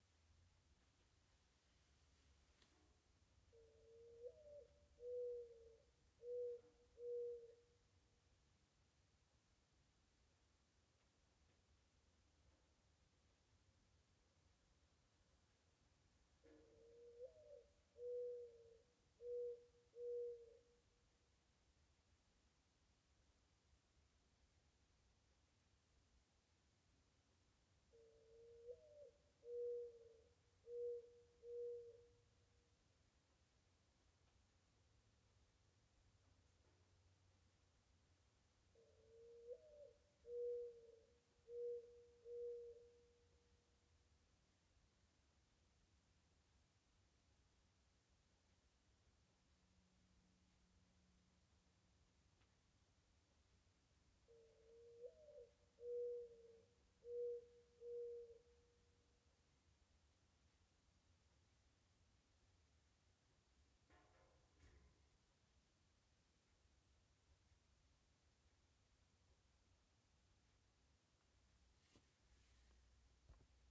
A gently cooing mourning dove with a few refrains.